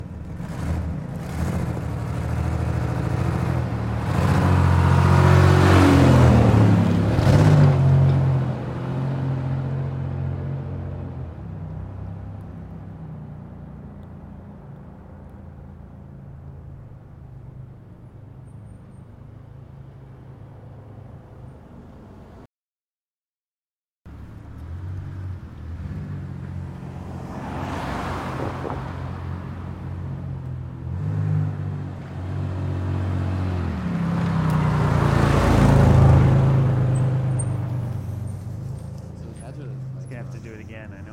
auto performance car trashy throaty start nearby and rev pass by medium speed doppler
by, start, auto, medium, car, performance, rev